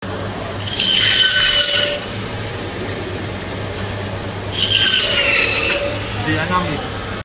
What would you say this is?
An electric butcher's bone saw cutting through some meat and bones.